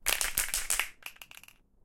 Various shaking and rattling noises of different lengths and speeds from a can of spray paint (which, for the record, is bright green). Pixel 6 internal mics and Voice Record Pro > Adobe Audition.